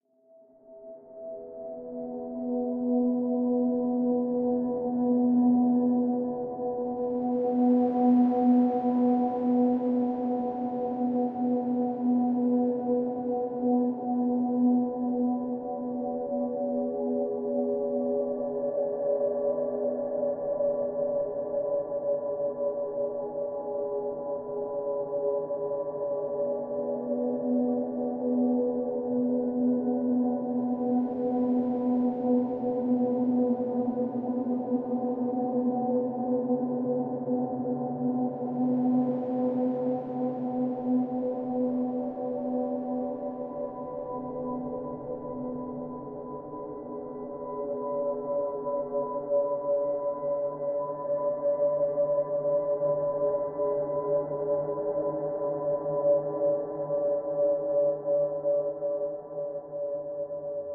Industrial Drone From Guitar Harmonics
bow, drone, guitar, harmonics, industrial, natural, violin